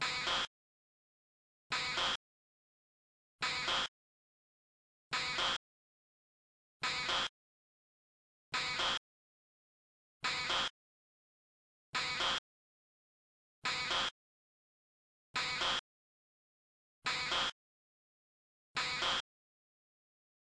Funny Alarm
Alarm created with a piano note and plugins of Protools.